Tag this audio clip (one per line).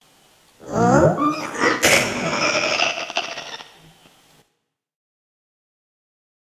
creepy
demon
demons
devil
evil
ghost
growl
haunted
hell
horror
laugh
manic
phantom
satan
sinister